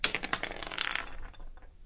rolling pencil
pen, pencil, rolling